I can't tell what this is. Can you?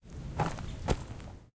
Two footsteps on gravel.
step, walk, gravel, steps